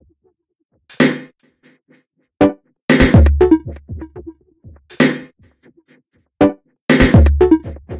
Massive Loop -43
An weird experimental loop with a minimal touch created with Massive within Reaktor from Native Instruments. Mastered with several plugins within Wavelab.
120bpm, drumloop, experimental, loop, minimal